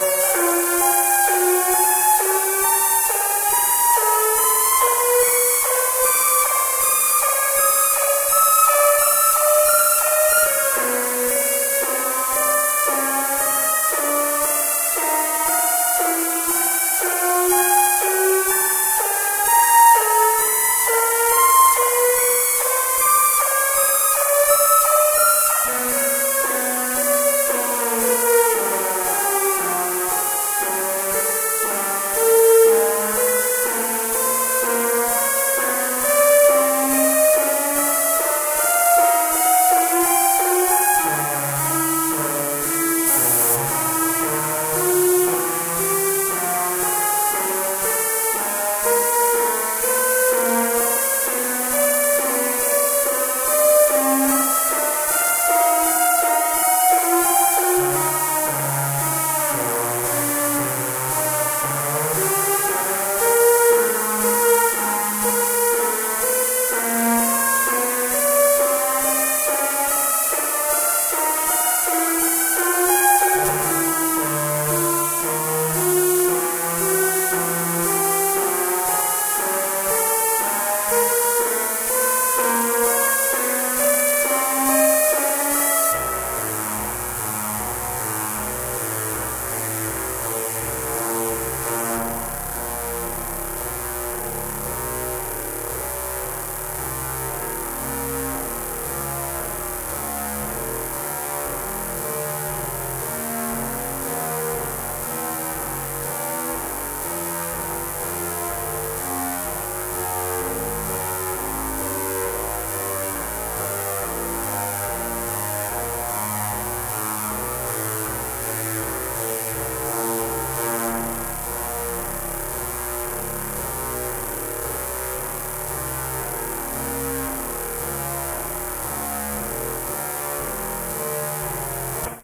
VCS3 Sound 7
Sounds made with the legendary VCS3 synthesizer in the Lindblad Studio at Gothenborg Academy of Music and Drama, 2011.11.06.
The sound has a 1960s science fiction character.